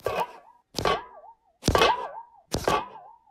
Liquid wobble
A half-full aluminium can being flicked across a table, and the liquid inside making a cartoon wobbling noise. Recorded on a Zoom H6n.
– hello! You're under no obligation, but I'd love to hear where you've used it.
cartoon, flupper, funny, hit, liquid, metal, percussion, spring, wobble